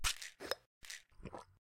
Pills shake, bottle opens and someone swallows.